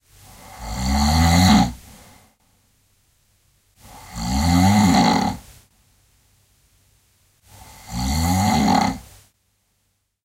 a man who snores so the whole house shakes